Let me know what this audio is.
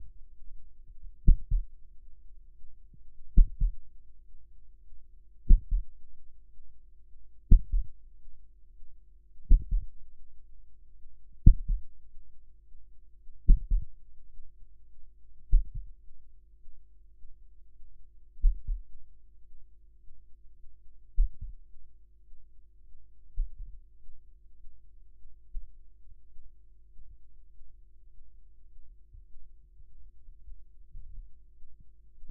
Heartbeat Stopping
This is a imitative heartbeat recording. I recorded using a contact microphone (Korg CM-200) connected to my Focusrite 2i2 interface. I attached the microphone to the tip on my middle finger, then with my middle and index finger tapped gently where my finger meets my palm.
This recording features a steady heartbeats the becomes progressively slower and softer, until finally it stops.
I used a Low pass filter to remove most of the treble making the recording sound more accurate to an actual heartbeat. I also removed a low buzz caused by be having to crank the gain high to get my intended sound.
beat, cm-200, Contact, Contact-microphone, Death, Dying, Heart, Heartbeat, Heart-Beat, korg, Medical